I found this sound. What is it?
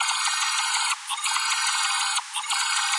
More melodic sequences and events created with graphs, charts, fractals and freehand drawings on an image synth. The file name describes the action.
dance, sound, loop, space